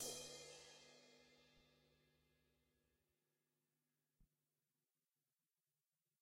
Drums Hit With Whisk
Hit, With, Drums, Whisk